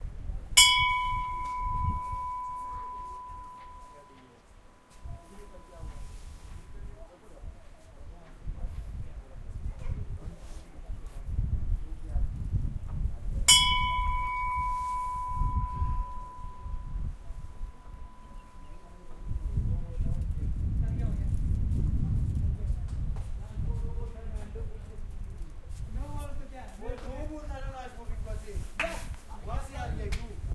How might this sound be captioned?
Indian Temple Bell
Recorded with Zoom H6N in Kothi, Himachal Pradesh, India 2019.
Bell, Bells, Bells-ringing, Himachal, India, Indian-Temple, Mountains, Religion, Temple